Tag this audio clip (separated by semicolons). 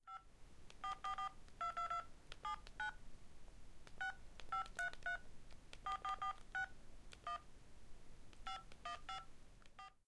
aip09; button; buttons; cell-phone; dialing; phone; pressing; text; texting